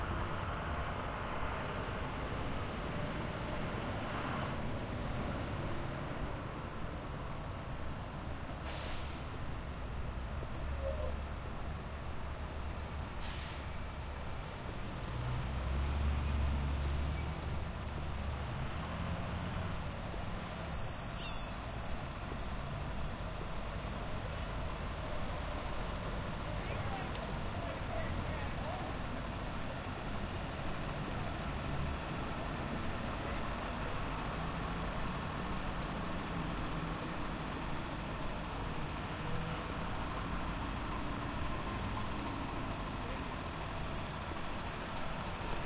High School Exterior Ambience (Morning)
Here is some ambience of the exterior of my high school in the morning. In the recording, I begin at the end of the parking lot and finish the recording just outside the entrance to the school. You will most prominently hear the sounds of school buses' engines running, their air brakes, and a few pulling out of the school. Recorded with the Zoom H4N.
high, school, buses, exterior